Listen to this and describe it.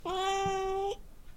Unsatisfied cat complaints to his owner.